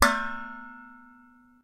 Hitting a large pot lid
hit, metal, pot, kitchen, lid, bang